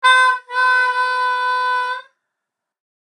Bad Pitch Pipe
Awkward pitch pipe. Blew air into a melodica while half-pressing one of the release keys to make it pitchy.
comedy, funny, joke, melodica, pitch